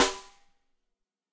Ludwig Snare Drum Rim Shot